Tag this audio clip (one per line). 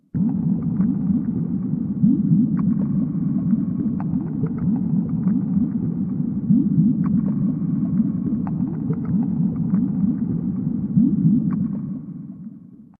aquatic
fish
submarine
under
under-water
water